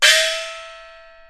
Recording of a single stroke played on the instrument Xiaoluo, a type of gong used in Beijing Opera percussion ensembles. Played by Ying Wan of the London Jing Kun Opera Association. Recorded by Mi Tian at the Centre for Digital Music, Queen Mary University of London, UK in September 2013 using an AKG C414 microphone under studio conditions. This example is a part of the "Xiaoluo" class of the training dataset used in [1].
chinese-traditional, peking-opera, chinese, idiophone, xiaoluo-instrument, icassp2014-dataset, china, compmusic, percussion, gong, qmul, beijing-opera